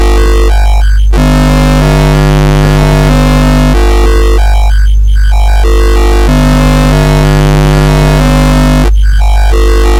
Fractal 1 10sec 50Hz
Generated from fractal image, changing set of square waves at different frequencies. 10 sec long, 50Hz pitch.
fractal, image-based